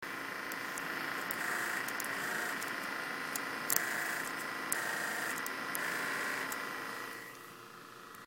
Keyboard click and whirring of harddrive
Macbook, failure, computer